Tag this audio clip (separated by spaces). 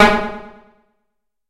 bass multisample reaktor